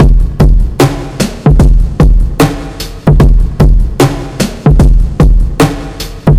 remix of a downtempo beat added by Zajo (see remix link above)
reverb distorsion and compression